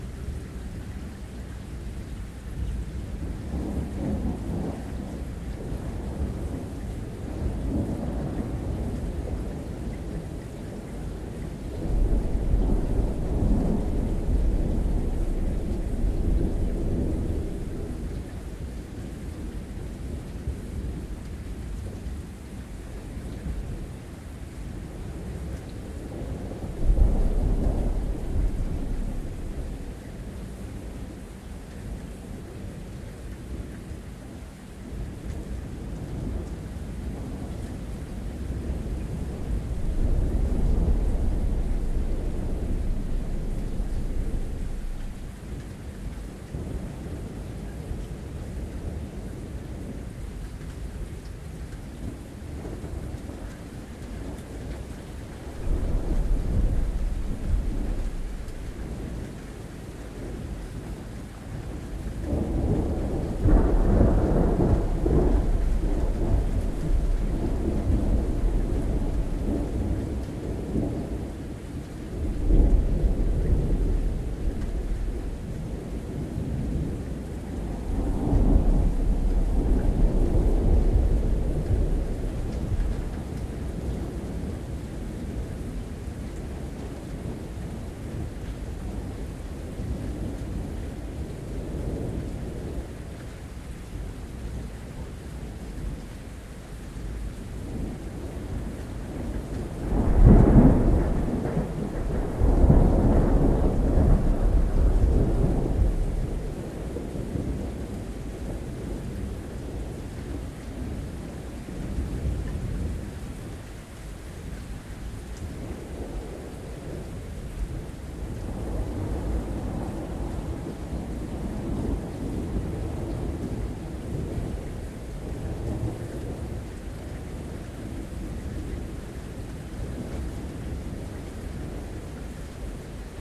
Rain & Thunder
Rain and thunder with some added rumbles to make the thunder more powerful.
storm, weather, thunderstorm